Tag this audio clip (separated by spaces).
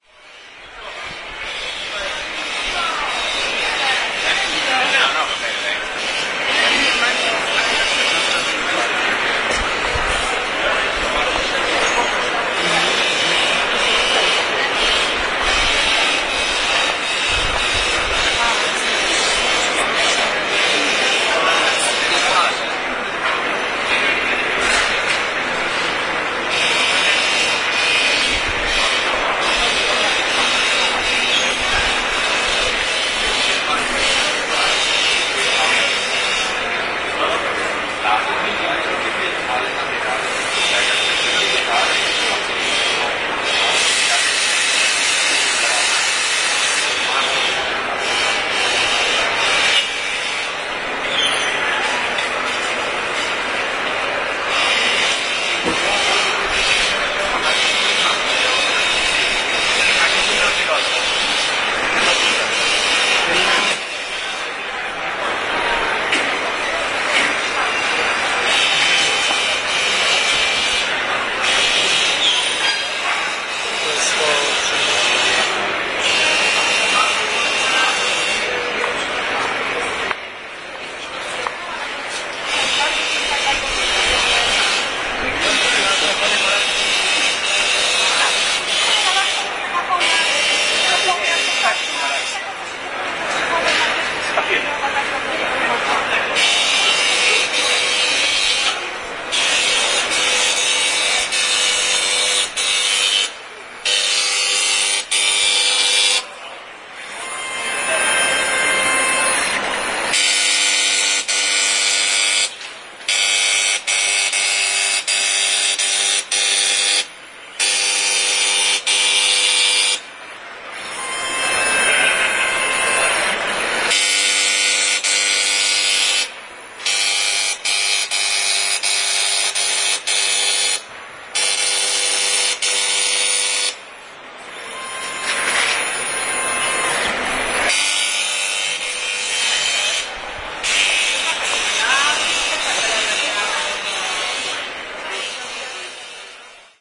poland poznan stone-fair noise fair mtp saw stone hall machine industrial crowd